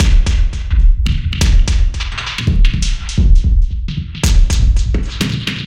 Glitch Drum loop 5b - 2 bars 85 bpm
Loop without tail so you can loop it and cut as much as you want.
beat, drum, drum-loop, drums, electronic, glitch, groovy, loop, percussion, percussion-loop, rhythm